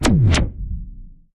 Sci-Fi effect for gun or other effect.
The Effect is created in Adobe Audition 2019 CC.
The source sound was a bomb explosion, which can be found in free access on the Internet without any rights.
Added effects distortion and Sci-Fi style.